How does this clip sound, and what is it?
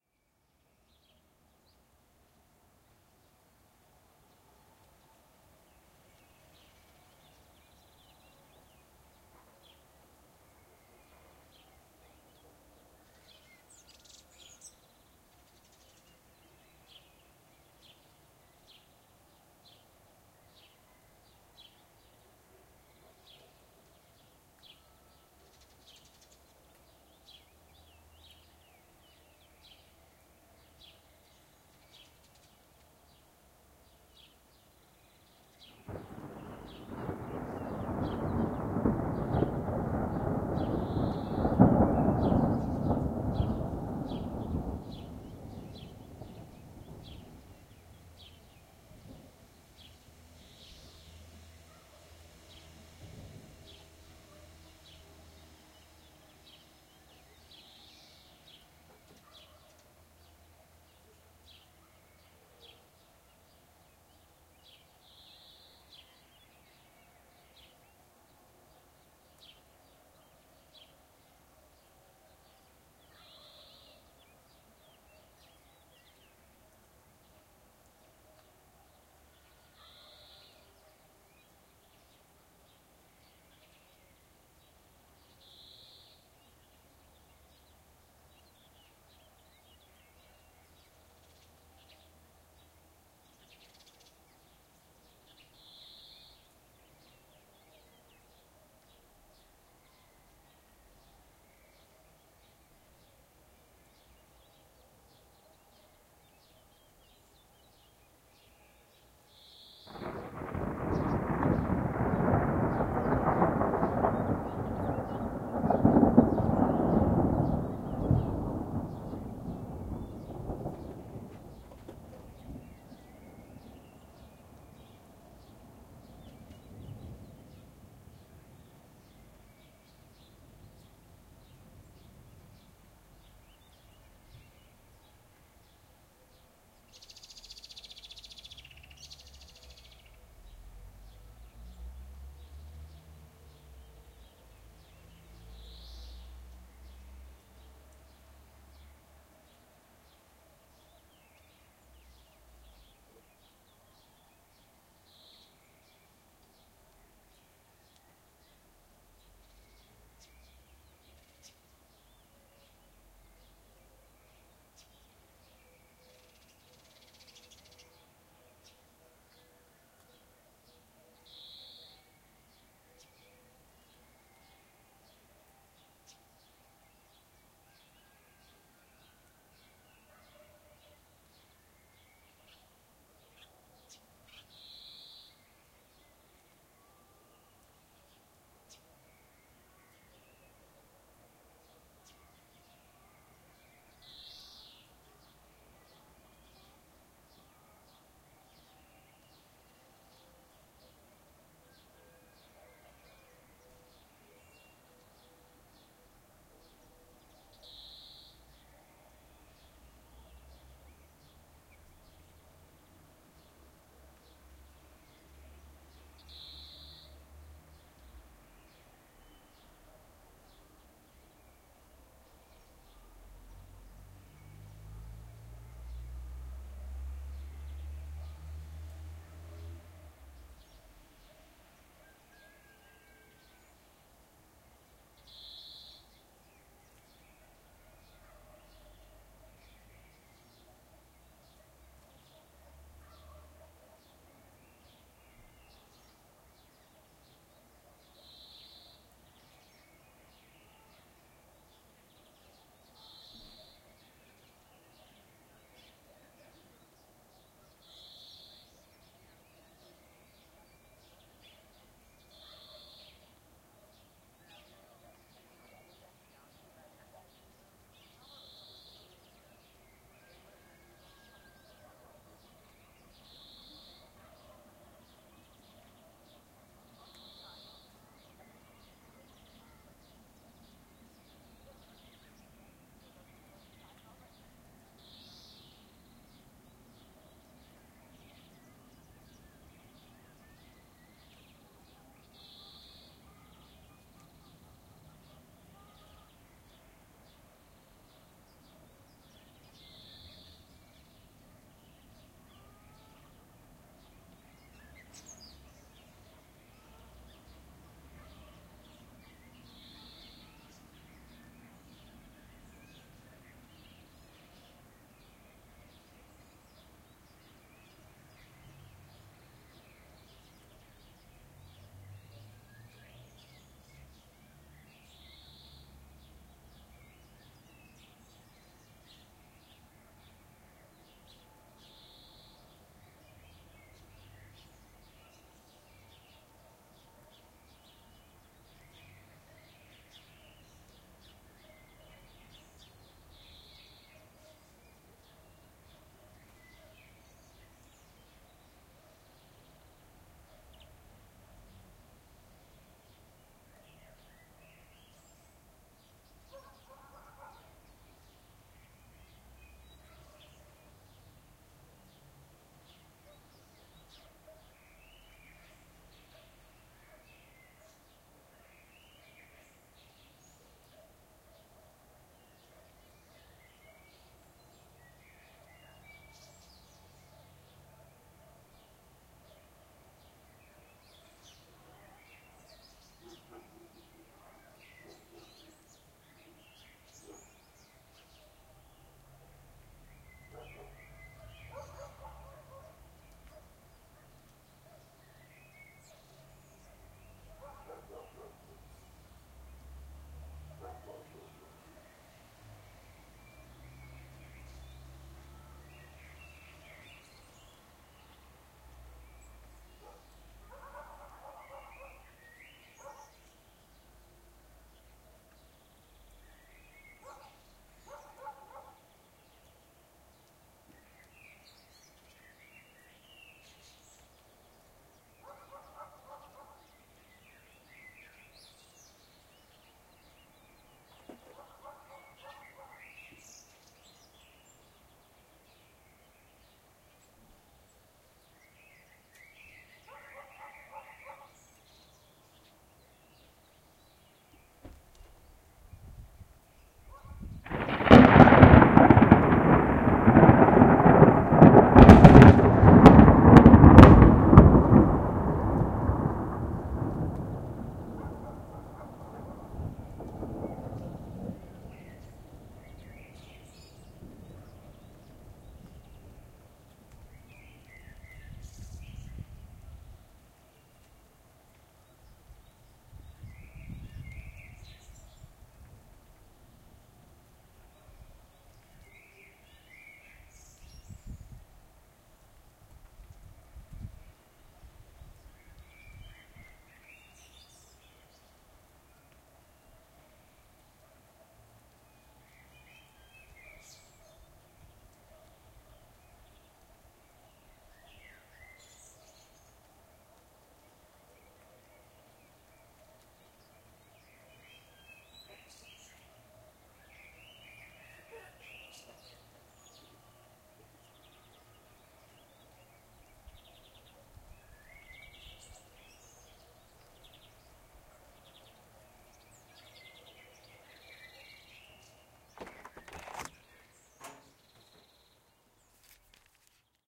5th April 2017 thunderstorm from cyclone, short clip. Recorded from Pécel, Hungary, SONY ICD-UX512. Original.